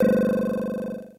SonoKids-Omni 26
A collection of 27 samples from various sound sources. My contribution to the Omni sound installation for children at the Happy New Ears festival for New Music 2008 in Kortrijk, Belgium.
happy-new-ears, sonokids-omni